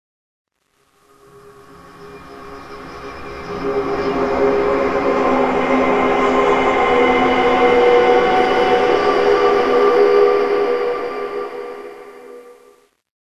Processed sounds made by me. Midi instruments from Logic Pro have been used along with effects this 5 second sound clip have been stretched and processed together with a sound recording of boiling water. This recording have been done with my mobile phone and the sounds are processed in Sound Hack. All sounds made by me.
bright, change, dream, way